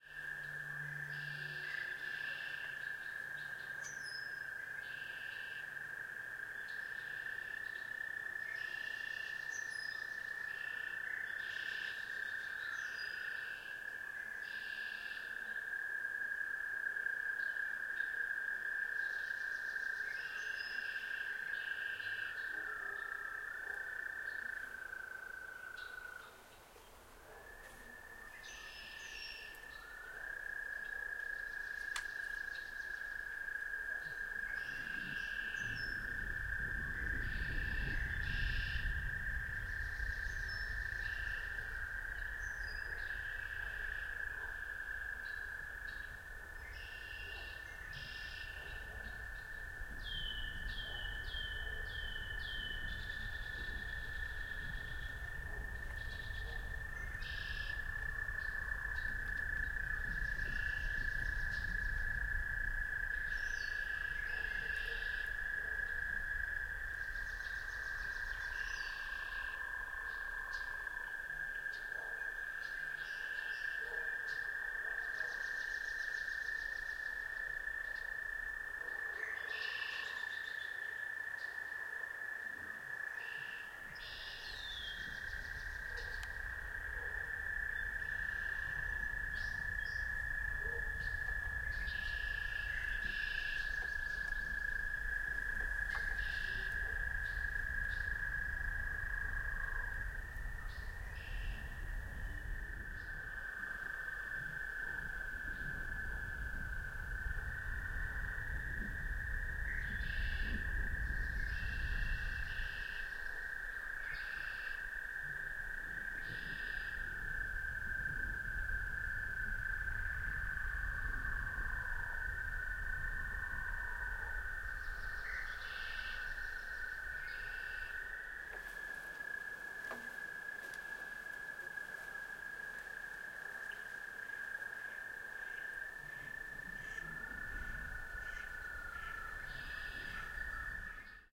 EXT MS spring marsh
Active marsh in spring at dusk, some wind. distant dog barks. Active frogs and birds.
This is the back pair of a Quad recording done with a ZoomH2. (The file with the same name but XY is the front pair).